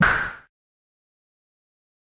Menu Move 1
A retro video game menu sound effect. Played when the player moves between selections.
sound, effect, nintendo, menu